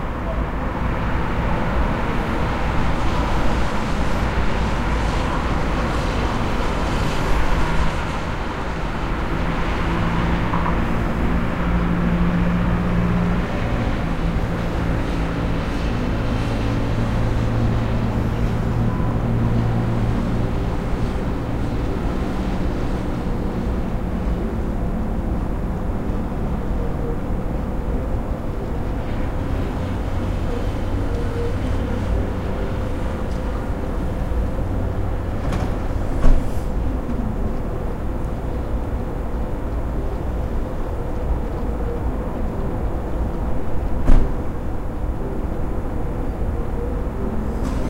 Sample taken from outside a Moroccan Hotel at night